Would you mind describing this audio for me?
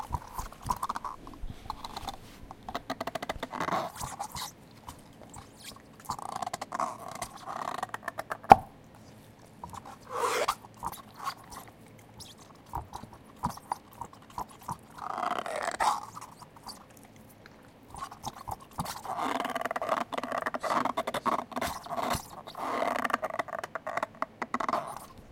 PHOEBE WITH RAQUETBALL 2
My dog chewing on a raquetball.
ball, creepy, dog, pop, rubber, rubber-ball, squeek, weird